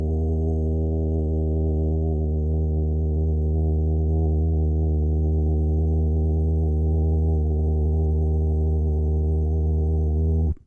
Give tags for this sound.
dry; human; male; vocal

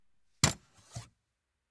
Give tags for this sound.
efectos; motions; audiovisuales; animation

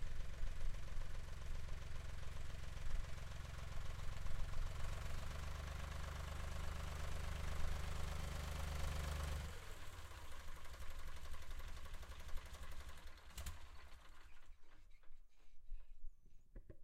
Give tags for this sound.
motor; stoping; tractor

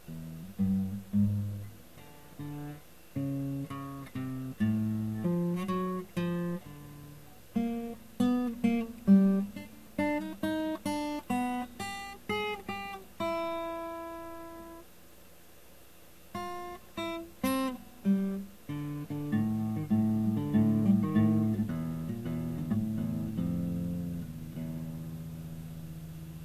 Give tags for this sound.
acoustic; guitar; clean; nylon

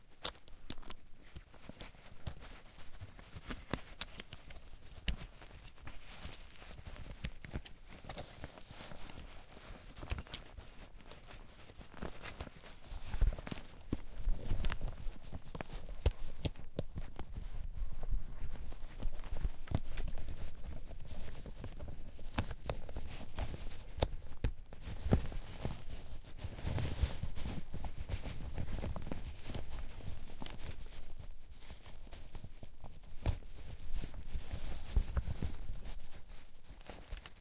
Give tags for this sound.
rustle; backpack; snap; rustling; movement; pack; fabric; cloth; foley; click; stereo; clothes

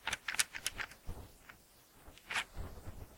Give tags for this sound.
pack; Sugar; bag

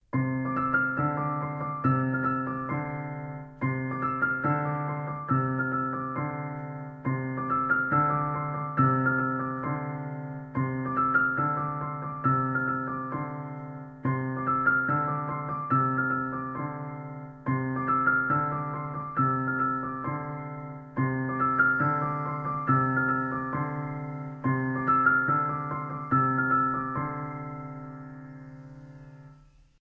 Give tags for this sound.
happy; music; fun; piano; background

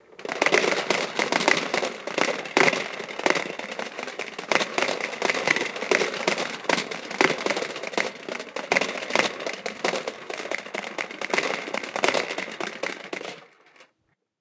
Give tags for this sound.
popcorn; popper; corn-popper; popping

